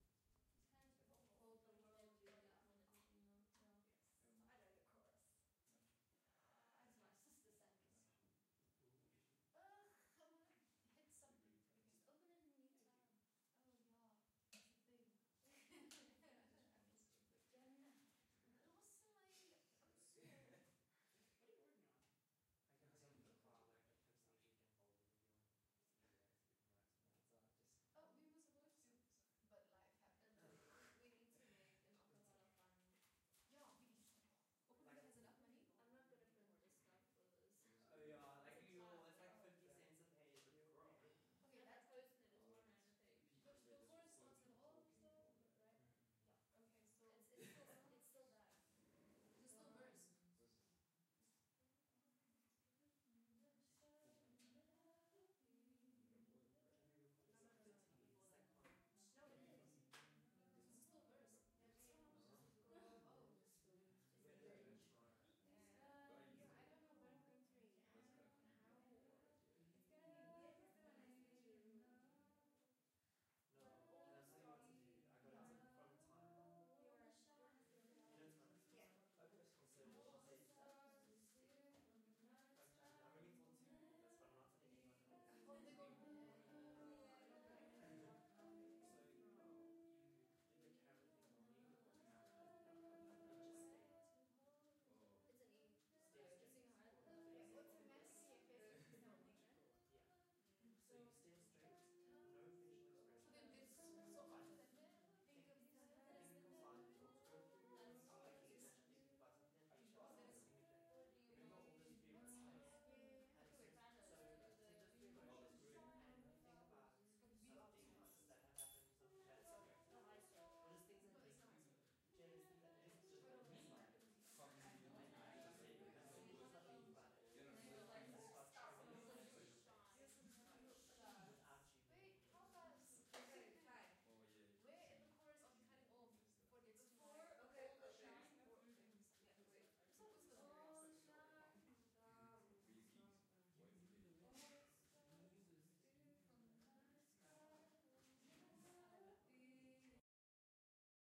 Recorded a small group of people talking in the back of a room, no sentences can be made out just mumbles. there are two different conversation happening in the background.
indoor-recording, small, OWI, room